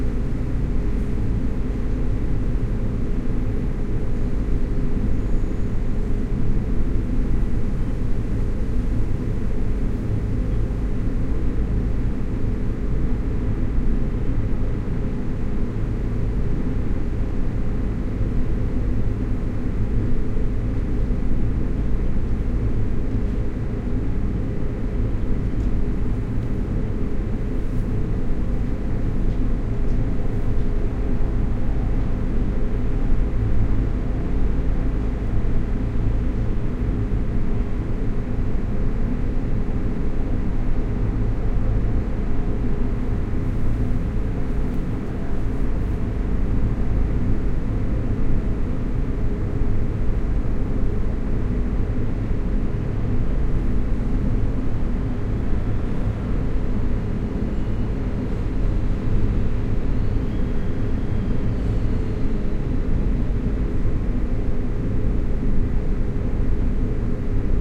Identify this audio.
The sound of a giant 2m by 5m air conditioning system exhaust. Another in the series of industrial drones with tiny artifact sounds. Lots of low frequency information. MS recording using a Sennheiser MKH 8020/ MKH 30 pair on a Sound Devices 302 field mixer to Nagra Ares PII+ recorder. Decoded LR stereo at the mixer stage.